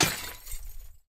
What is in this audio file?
Vial Breakage
A glass bottle smashing sample with liquid layering sounds. Could be used in the application of high fantasy or an accident involving lab work or a well stocked bar and the waitress/waiter isnt too good with the mixing?